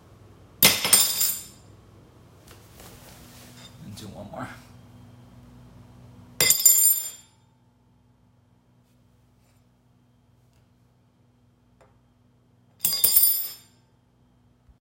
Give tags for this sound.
clatter cutlery floor fork hit knife limestone metal silverware steel

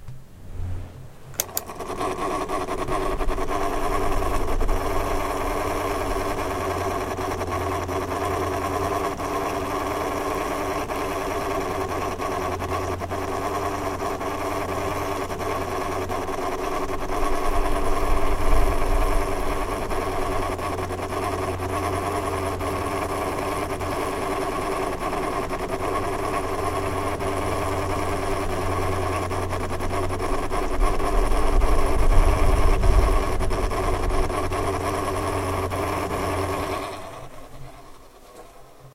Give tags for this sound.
appliance broken-down fan

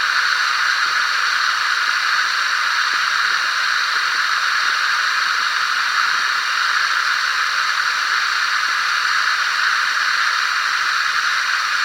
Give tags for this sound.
hourglass loop mono pouring request sand time

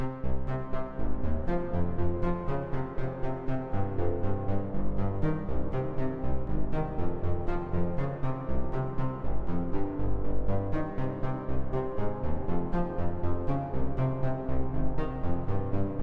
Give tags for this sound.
strings synth bpm loop orchestra music 120 rhythmic classical cello

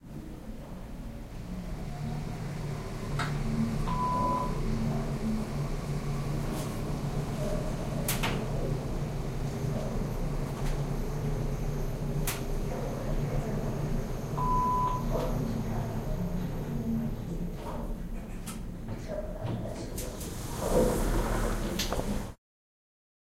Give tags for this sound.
elevator move lift machine building

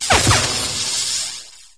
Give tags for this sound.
lightsaber wars

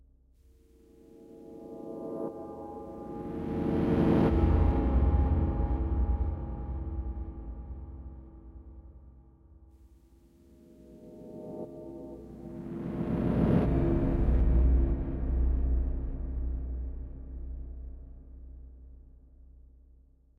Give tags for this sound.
bladerunner build dark drone evil futurustic incoming landing low low-frequency menacing retro rumble sci-fi scifi sound space stranger things threat threatening up upcoming

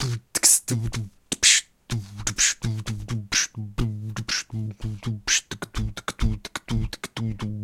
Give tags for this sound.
beatbox dare-19 beat bfj2

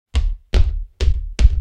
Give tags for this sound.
Big Monsters Steps

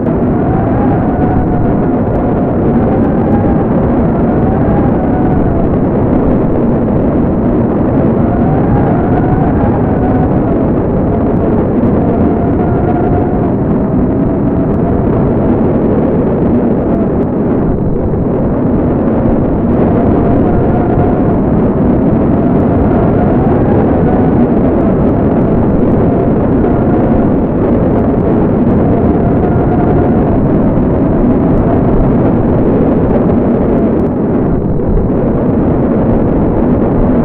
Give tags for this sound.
hurrincane storm tornado twister

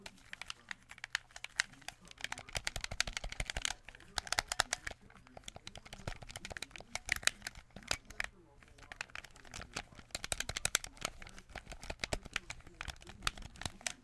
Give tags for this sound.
controller game mashing microsoft playstation sony sound wii xbox

